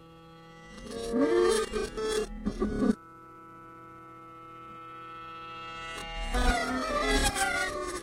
tk 11 mic scrape 3rvrslo
A heavily processed sound of a mic scraping on guitar strings.
electronic, guitar, music, processed